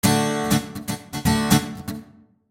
Rhythmguitar Dmaj P107
Pure rhythmguitar acid-loop at 120 BPM
rhythmguitar, 120-bpm, loop, guitar, rhythm, acid